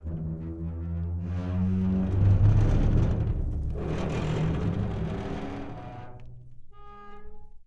metal gate 02
Large metal gate squeaks rattles and bangs.